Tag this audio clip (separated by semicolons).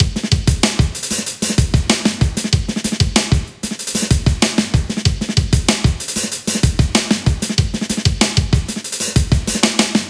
drum-loop; drums; groovy